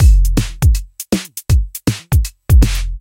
MFB-503 Drumcomputer - 07 (160 BPM)
Beats recorded from an MFB-503 analog drummachine
Analog, Beats, Drum, Electronic